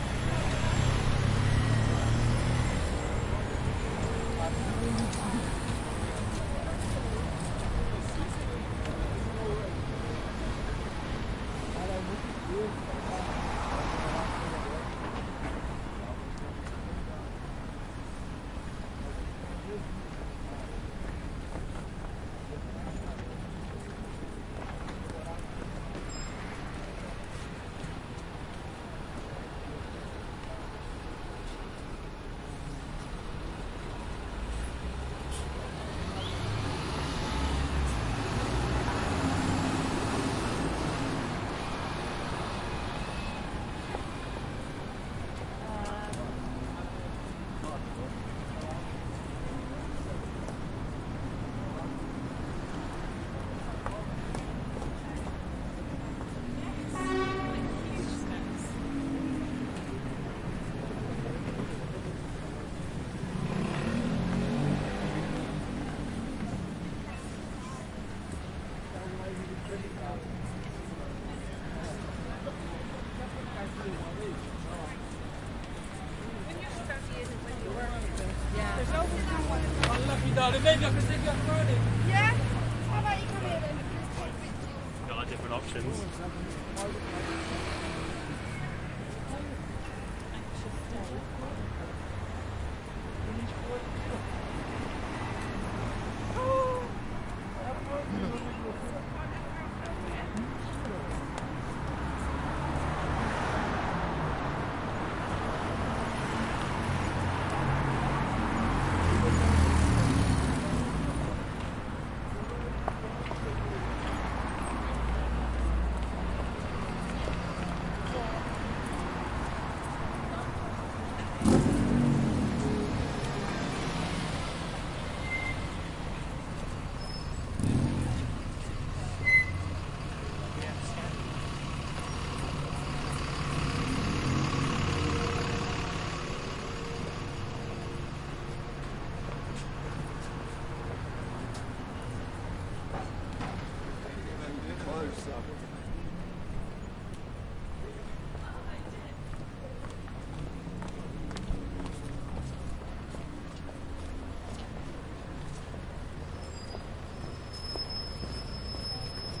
Walking through busy streets
A recording of myself walking around London Bridge in the evening.
This recording was made with a ZOOM H4N recorder.
ambience, walking, london, h4n, zoom, uk, atmos, urban